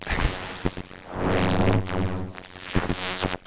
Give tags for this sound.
glitch-sfx; glitch; glitch-sound-effect; sound; effect

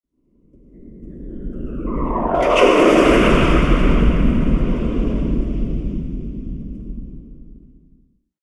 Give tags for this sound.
whistle; fx; synth; boom; bomb; engine; flyby; jet; swoosh; synthetic; doppler

